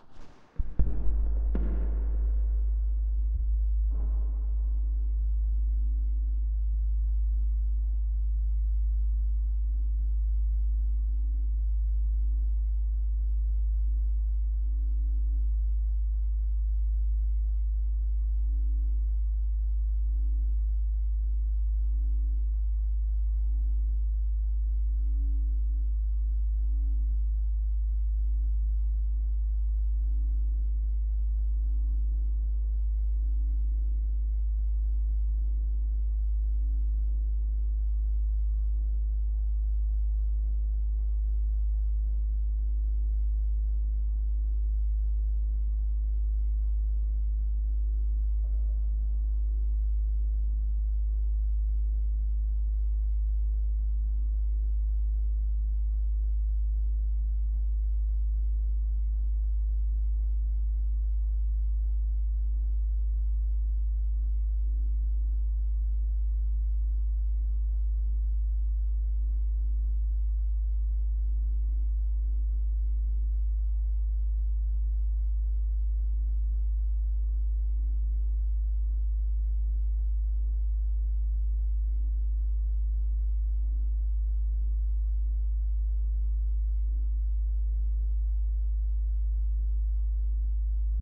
Free drone. Recorded using homemade contact microphones. The OS-XX Samples consist of different recordings of fans, fridges, espressomachines, etc. The sounds are pretty raw, I added reverb, and cut some sub. I can, on request hand out the raw recordings. Enjoy.